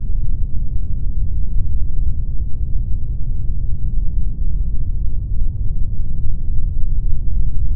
Very nice deep background.
ambient, dark, darkness